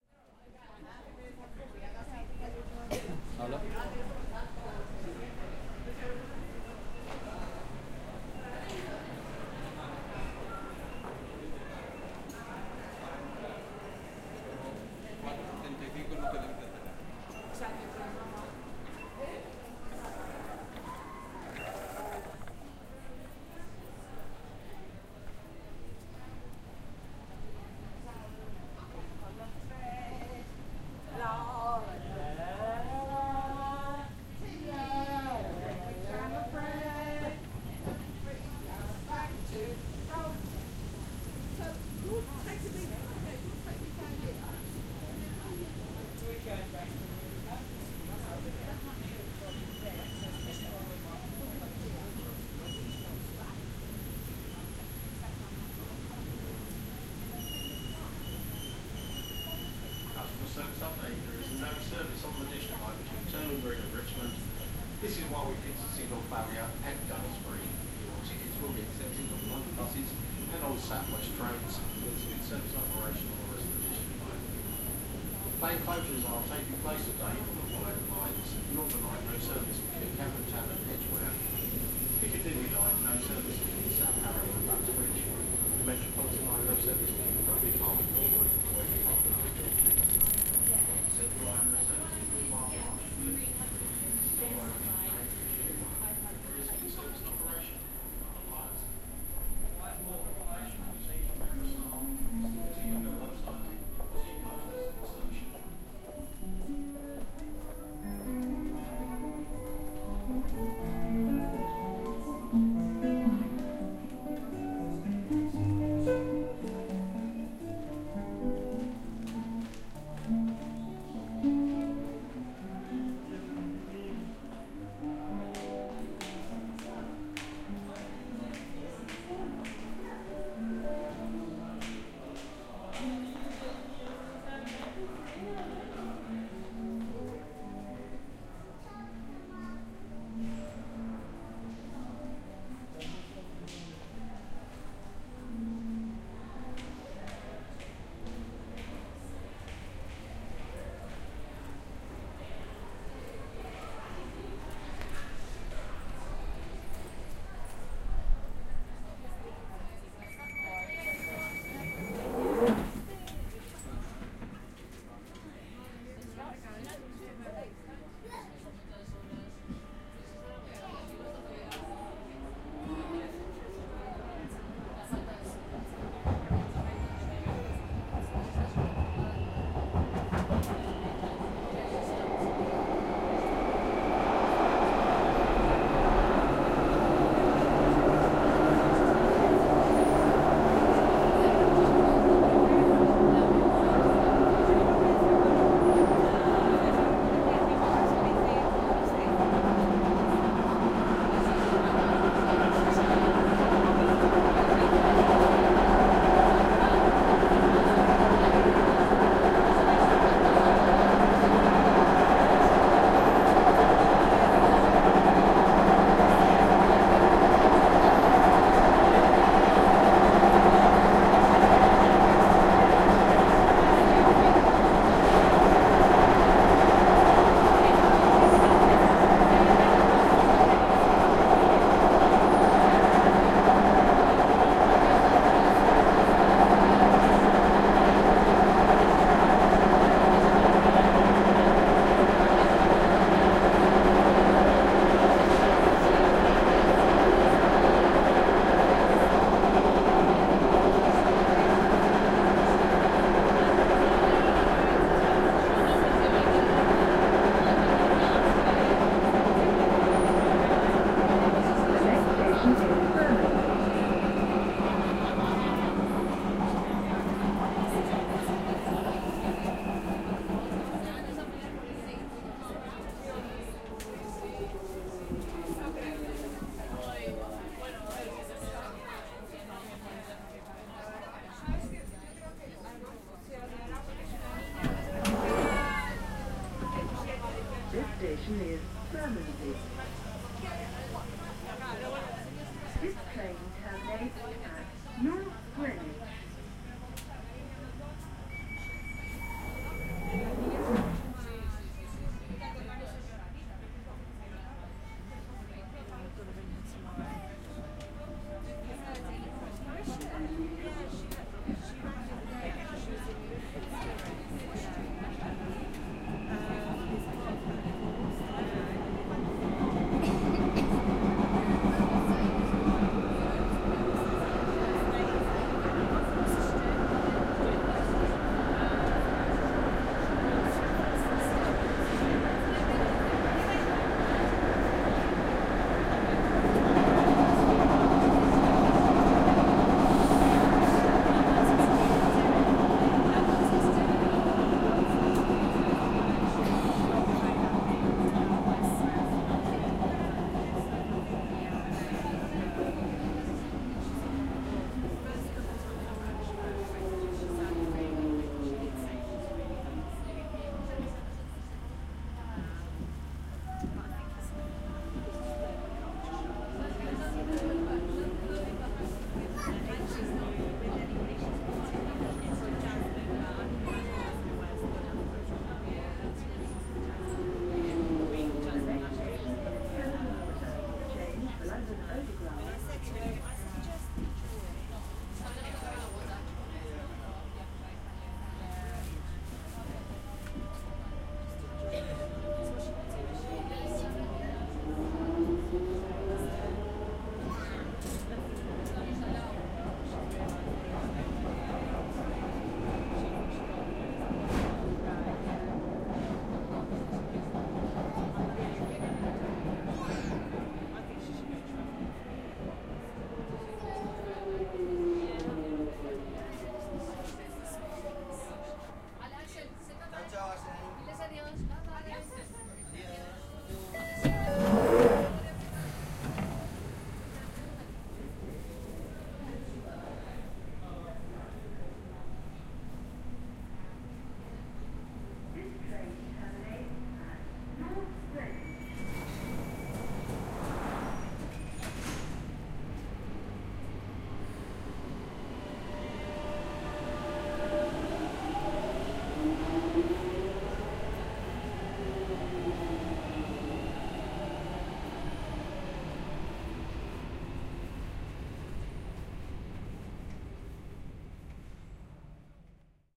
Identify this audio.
Jubilee Line - London Bridge to Canada Water
London underground ambience from London Bridge to Canada Water via Jubilee Line.
Recorded with a Zoom h4n, 90º stereo on April 2014.
zoom-h4n, train, subway, urban-recording, jubilee-line, london-bridge